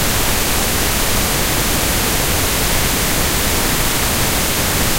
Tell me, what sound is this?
independent pink noise
Independent channel stereo pink noise created with Cool Edit 96. Unaltered...
noise, pink, pure, stereo